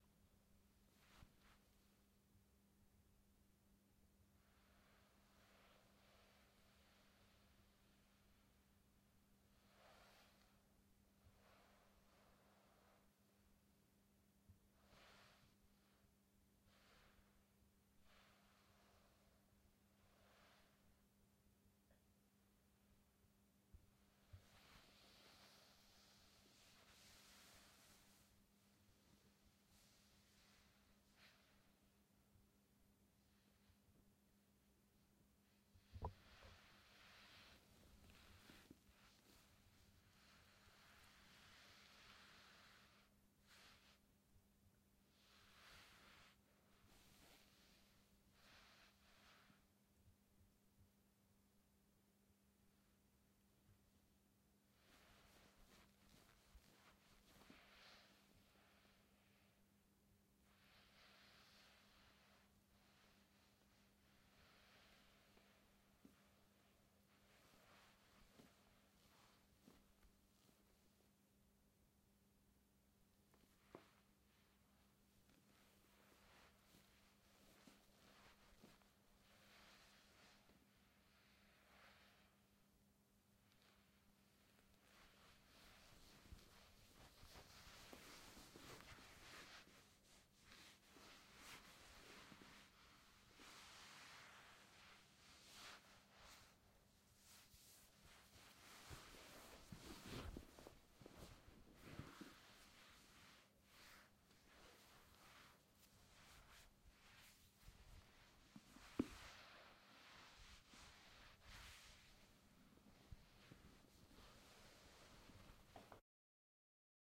BODY MOVEMENTS
This is a person in cotton fabric moving in a small room space.